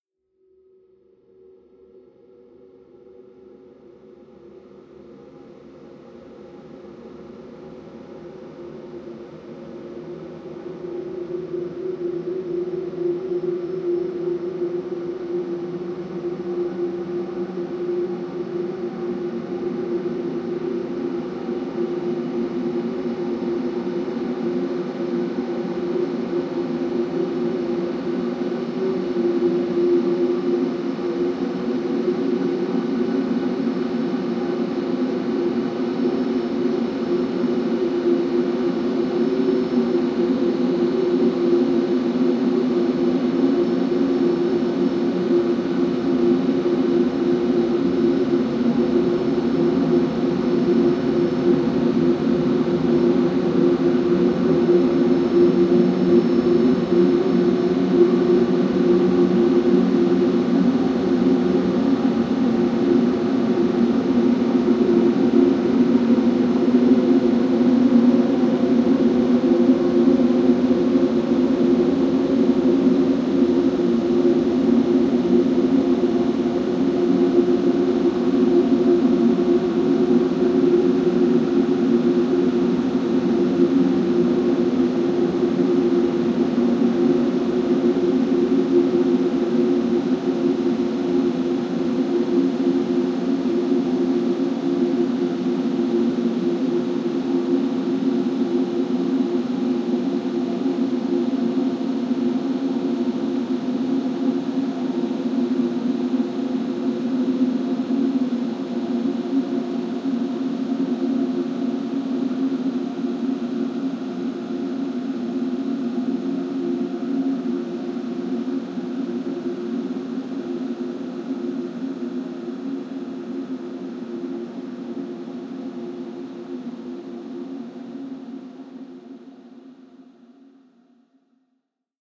Melodrone multisample 06 - The Sound of Dreaming iInsects - E4
This sample is part of the “Melodrone multisample 06 - The Sound of Dreaming iInsects” sample pack. A massive choir of insects having a lucid dream on Uranus. The pack consists of 7 samples which form a multisample to load into your favorite sampler. The key of the sample is in the name of the sample. These Melodrone multisamples are long samples that can be used without using any looping. They are in fact playable melodic drones. They were created using several audio processing techniques on diverse synth sounds: pitch shifting & bending, delays, reverbs and especially convolution.
multisample, ambient, atmosphere, drone